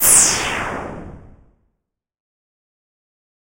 A sweeping effect made of white/square noise. Created using SFXR